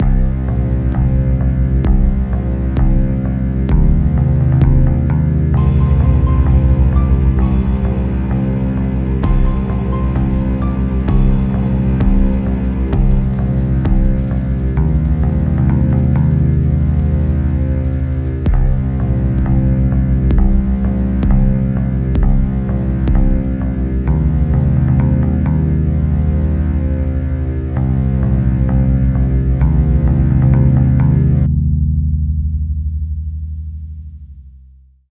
A dude walks into a bar, says to a guy "what's up man?"
He knows the deal. Is this a good guy? or a bad man?
Nobody in the bar knows.
He leaves.
bar, bad-guy, looms, cool, strut, bass-riff, crime, true, walk, music, walking, into, danger, guy, walks, stroll, ambience, a, background